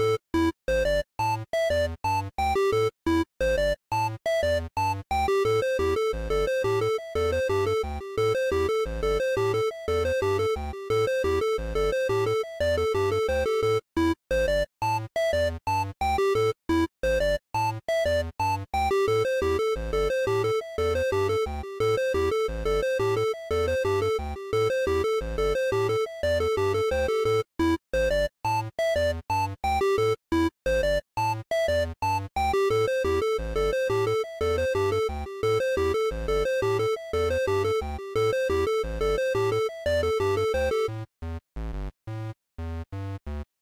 8bit Music for Game
A loopable chiptune bit I made for a game jam. I hope someone finds it useful!
8-bit, arcade, chip, chipsound, chiptune, gameboy, retro, video-game